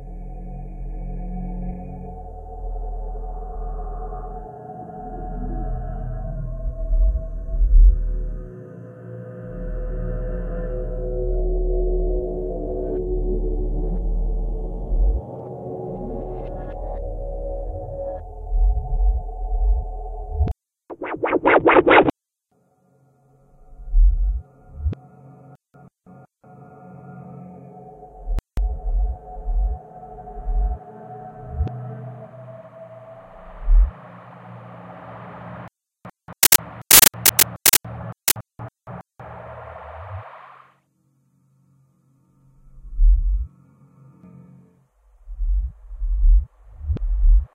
Swamp chaos
Very bizarre sounds emanating from the dark deep bowels of the balmy and humid swamplands!
CAUTION! Due to the frightening nature of this clip, parental advisory is recommended!
LOL!